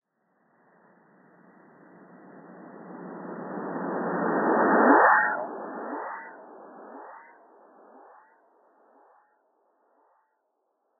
digifishmusic Eastern Whipbird 4X Slower flyaway-rwrk
remix of "Eastern Whipbird 4X Slower" added by digifishmusic.
edit, stereo fx, delay, reverb